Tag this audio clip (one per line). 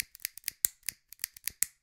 0
cigarrete
crackle
egoless
lighter
natural
sounds
vol